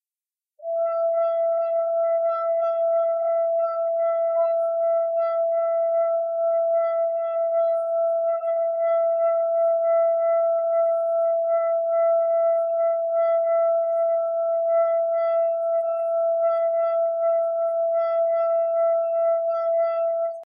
Crystal Glass Rim
The sound of a finger being run around the edge of a crystal glass. Heavily processed, but genuine. Recorded on my Walkman Mp3 Player/Recorder
glass, rim, rub, ring, crystal, tone, finger